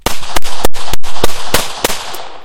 Bryco rapid fire
A TASCAM Dr-07 MkII stereo recording of the Bryco Arms Model 38, .380 ACP.
Recorded outside in a woodland environment. Here's a video if you like to see.
firearm, handgun, 380, arms, shoot, outdoor, pistol, 380acp, shot, 38, fire, model, shell, gun-shot, firing, gun, bryco